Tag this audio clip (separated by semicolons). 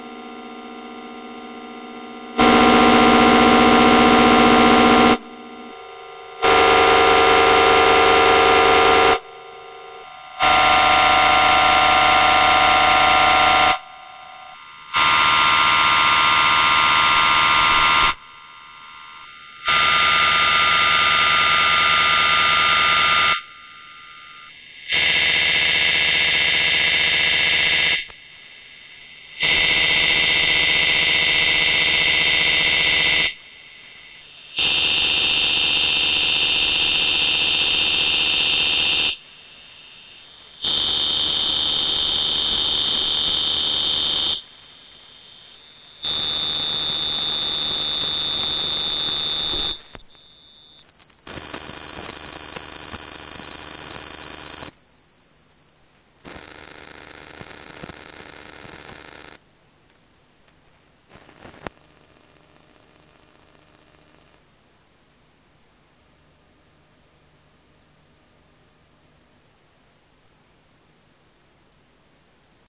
Kulturfabrik; Synthesizer